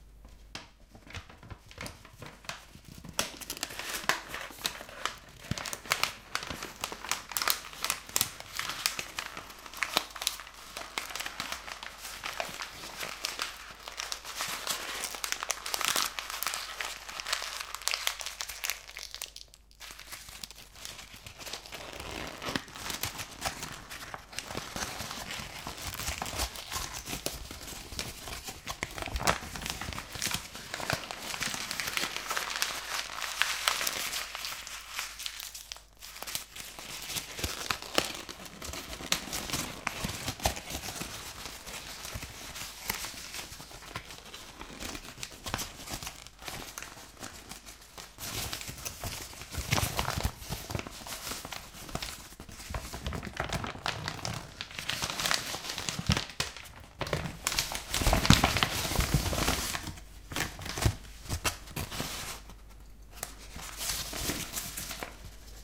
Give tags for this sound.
rustle; crumble; crumbling; object; paper; crumbled; knead

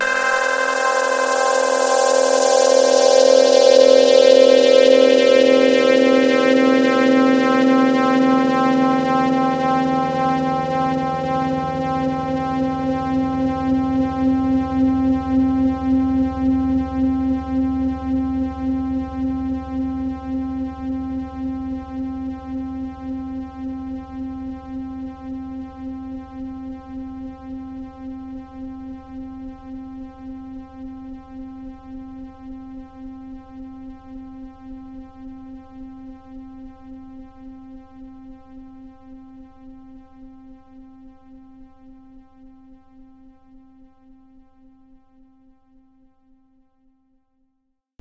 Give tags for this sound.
electronic; multi-sample; saw; sweep; synth; waldorf

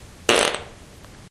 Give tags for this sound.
poot; space; flatulence; flatulation; explosion; noise; aliens; gas; car; frogs; fart; race

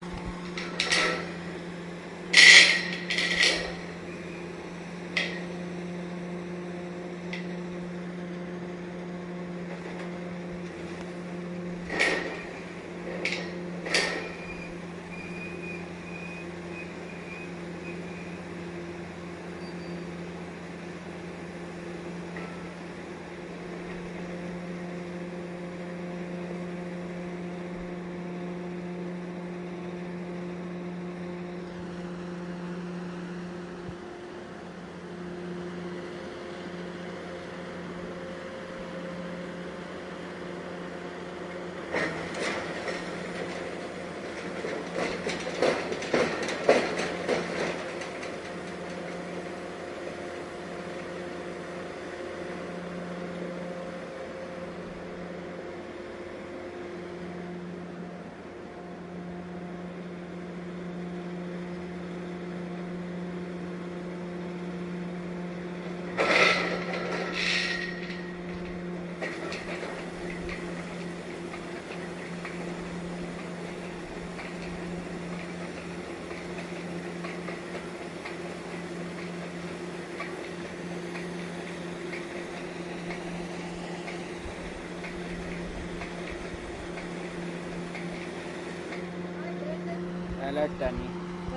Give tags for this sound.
ambiance; build; building; constructing; construction; crane; workers

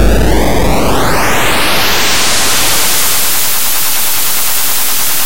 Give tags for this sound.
8-bit; arcade; chip; chippy; chiptune; decimated; lo-fi; noise; retro; sweep; vgm; video-game

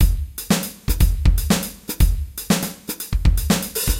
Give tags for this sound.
drum-loops; acoustic-kit; drum; kit; audiosauna; hit; loop; set

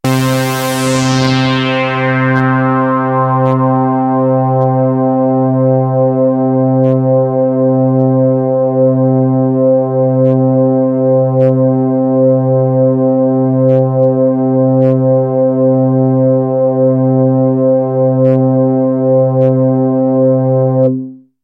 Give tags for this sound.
basic; dave; instruments; mopho; sample; smith; wave

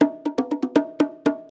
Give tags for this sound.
bongo; drum; environmental-sounds-research; percussion

